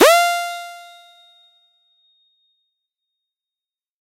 Tonic Electronic Effect with Bells
This is a electronic effect. It was created using the electronic VST instrument Micro Tonic from Sonic Charge. Ideal for constructing electronic drumloops...
electronic, drum